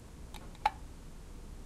turning lightswitch
plastic lightswitch changes from 0 position to 1 position.